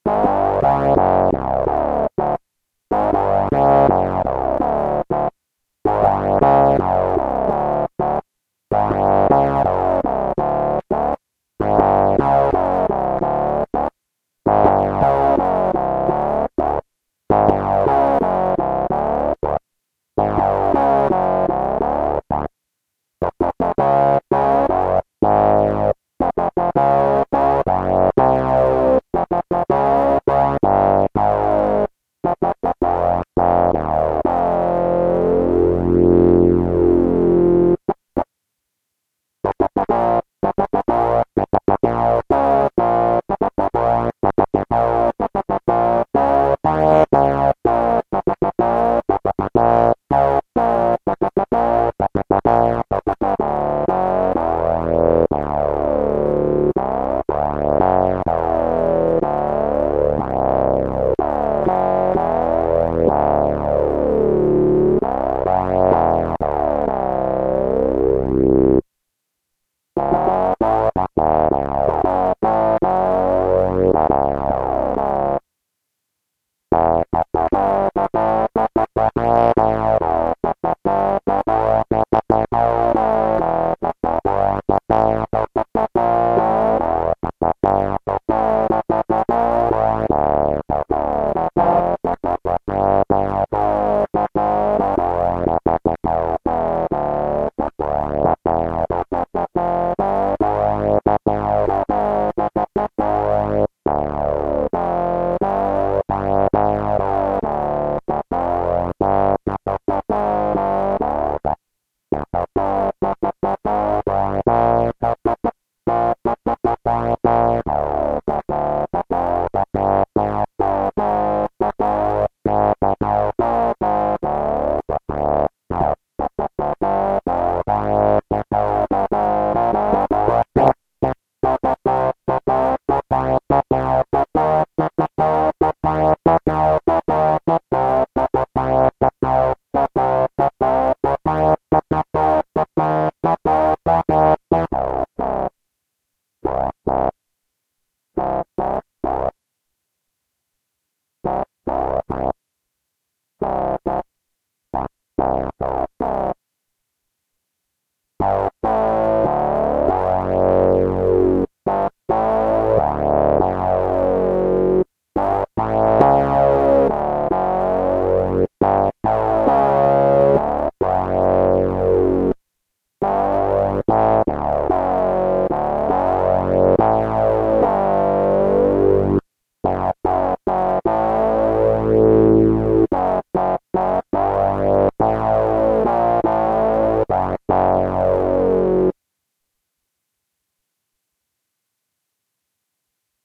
Cool Bass
Some bass phrases made in daHornet using the preset Low and Lower. The rhythm may be a bit choppy in some parts because of freestyling.
bass, buzz, club, deep, dub, electro, scratchy